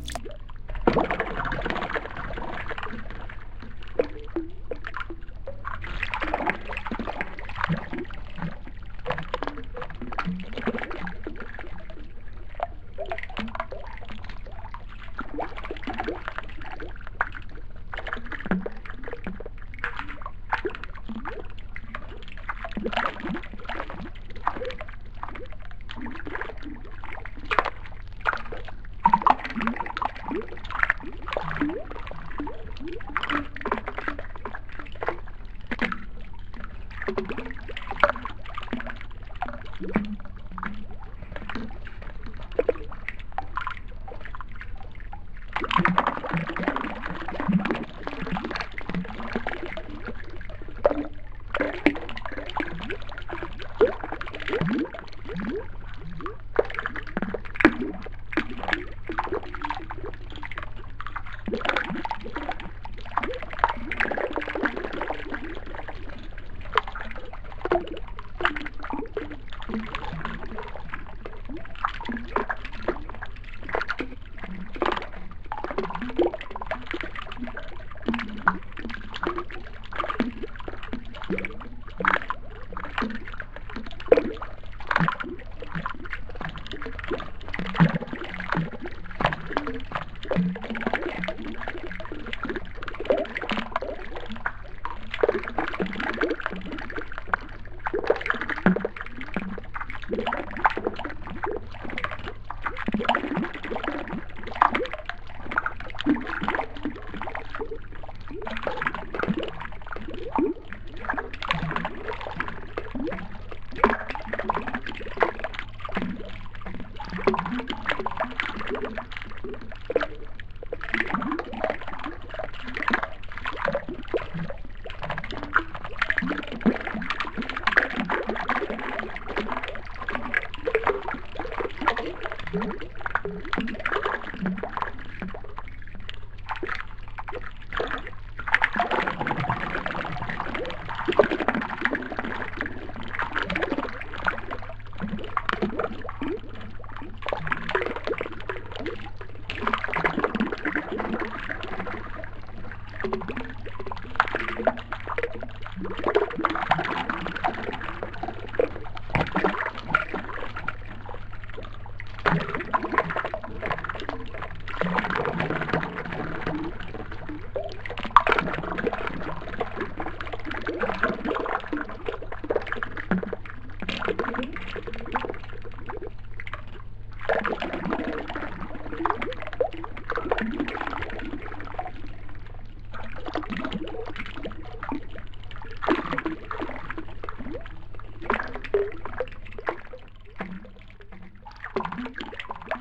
WATER DRIPPING ECHO LOW PITCHED COMPRESSED SLOWED
water dripping is a low pitch version with echo compressed in Audacity.
cave, water, erie, creepy, dripping